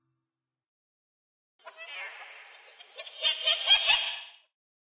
Wierd-Ass-Laugh
Me Laughing, Reversed With Reverb
Free, Edited